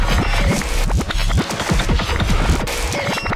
SoMaR BioTeK 96
Travel to the depths of Parallel Worlds to bring you these 100 sounds never heard before...
They will hear sounds of the flight of strange birds if they can be called that, of strangely shaped beings that emitted sounds I do not know where, of echoes coming from, who knows one.
The ship that I take with me is the Sirius Quasimodo Works Station, the fuel to be able to move the ship and transport me is BioTek the Audacity travel recording log Enjoy it; =)
PS: I have to give up the pills they produce a weird effect on me jajajajaja